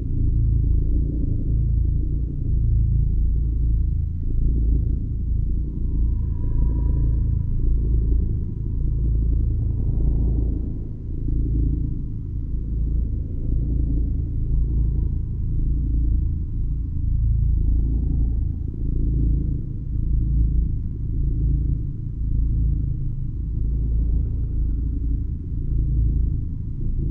kerri-cat-lrdelay-loopable
This is fully loopable version of it (no fade in/out needed). Additionally - it was remixed with the same sound (slightly shifted, to make only 1 cat instead of 2), with swapped channels, to make the sound more centered/balanced.